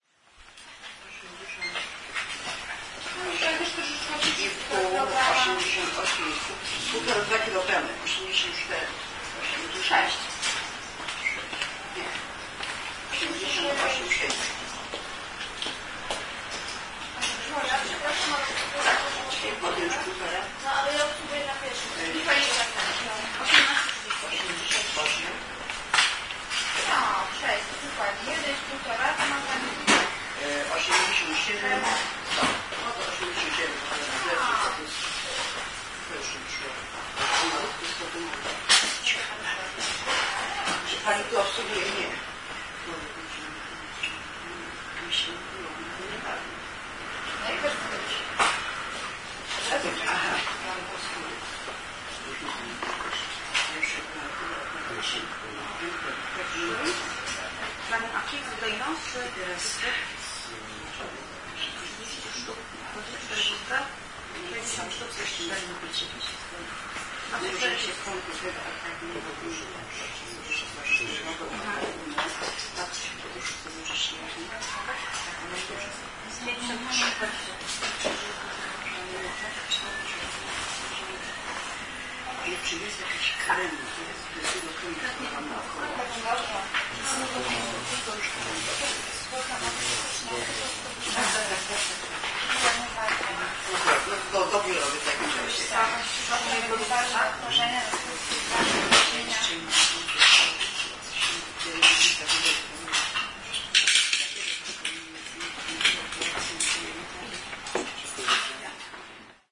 23.09.09: between 17.00 and 18.00, Poznań, pharmacy on Strzelecka street.
people, voices, poznan, drugstore, cashdesk, pharmacy, publicspace